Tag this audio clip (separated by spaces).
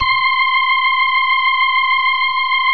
organ rock sound